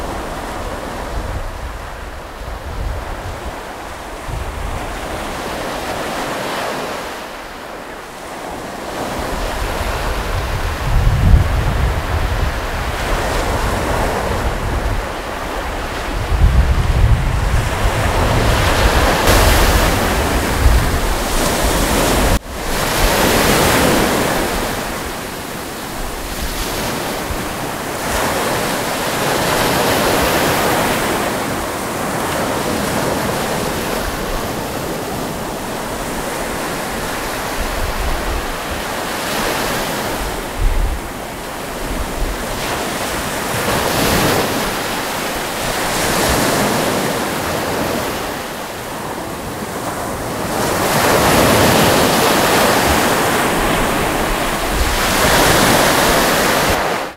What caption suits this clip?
Seaside Mono
Raw recording of the seaside. Edited with Audacity.
Beach, Field-Recording, Sea, Waves, Water, Seaside, Ocean